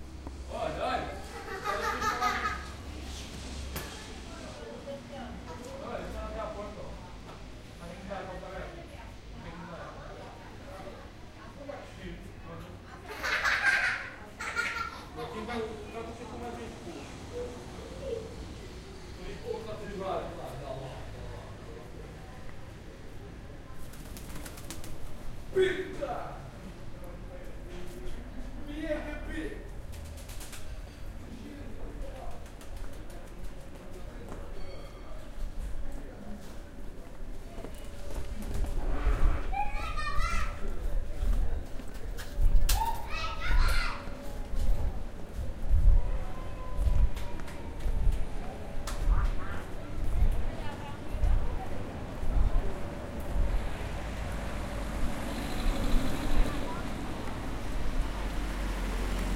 on the street 3
Made recording walking in the Lisbon, you can listen laughing children and noise of city.
traffic, ambient, city